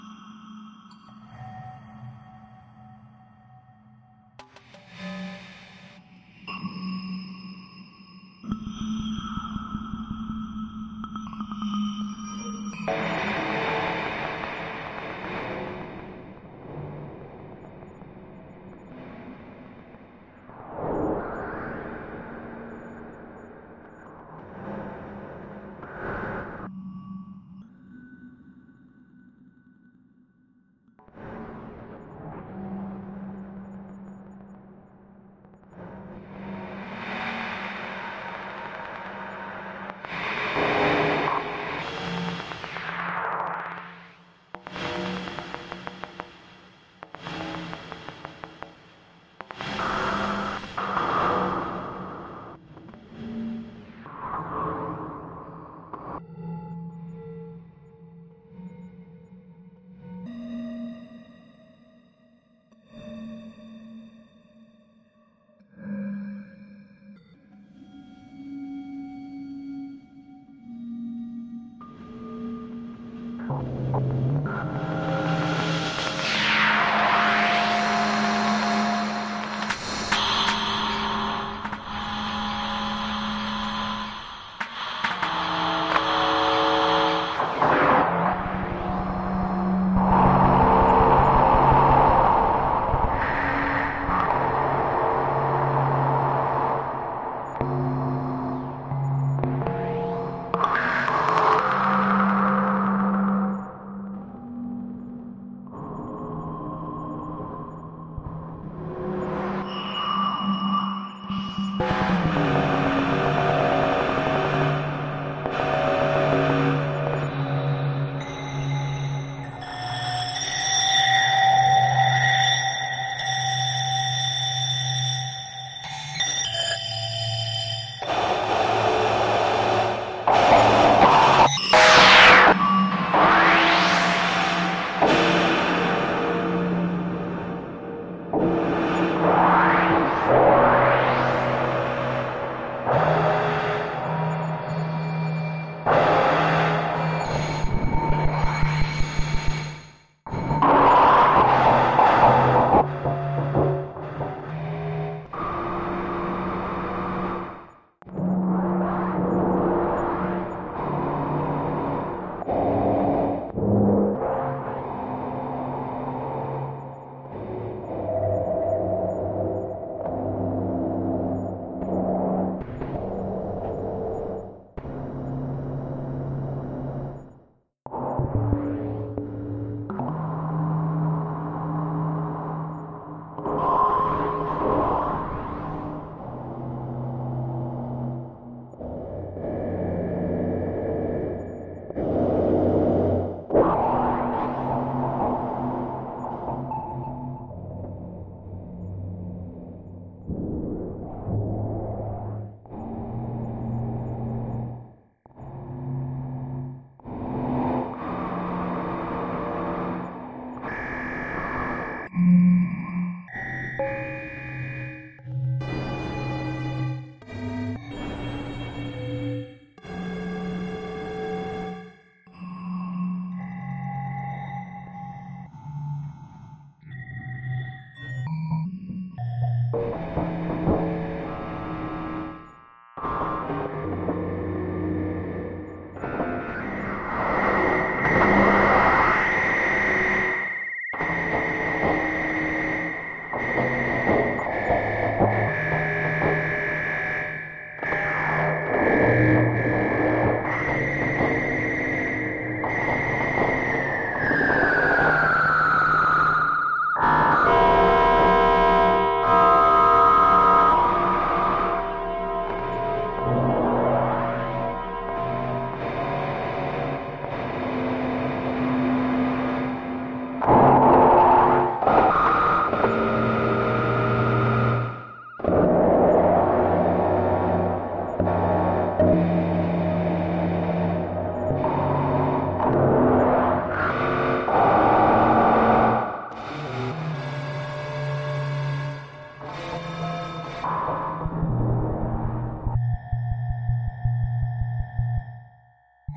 VCV Rack patch
abstract, ambient, sound-design, synthesizer